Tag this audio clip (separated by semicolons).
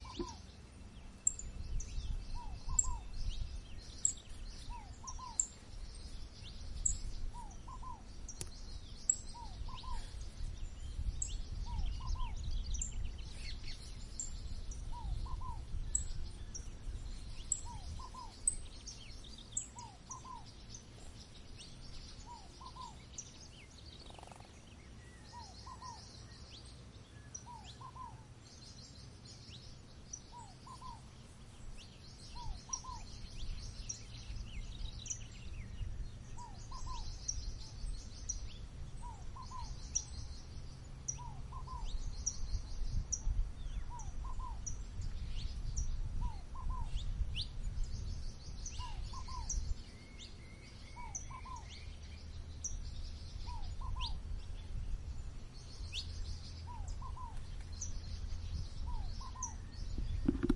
ambient; bird; birds; Brazil; calls; dawn; farm; fazenda; field; Goias; morning; nature; recording; rural; soundscape; tropical